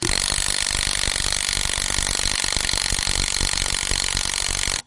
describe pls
Nut Gun On High 2
Crash, Impact, Steel, Friction, Boom, Hit, Plastic, Bang, Tool, Metal, Tools, Smash